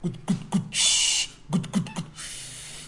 Cameroon AT TI 05 Ngout ngout tcheueu… fueuhhhhh
Foumban, Cameroon, Time